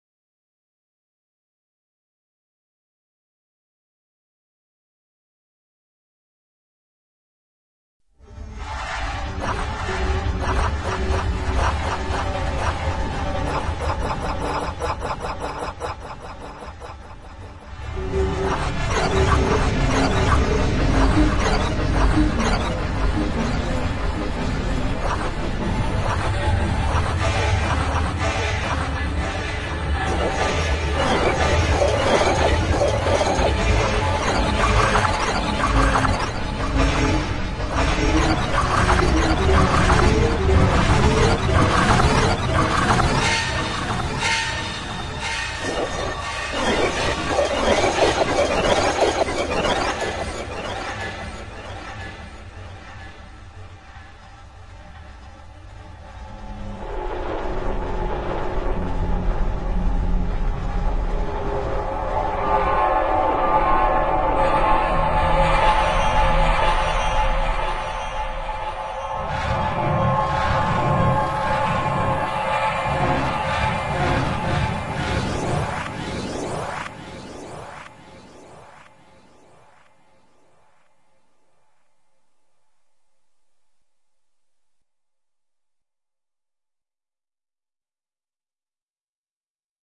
continuum alien invasion
Added delay
Added a sampled piece of Alienxxx' version on top, using petri-foo in Ardour3 beta3. Delay added on this too.
atmosphere, sound-scape, continuum, factory, horror, spooky, dare, continuum1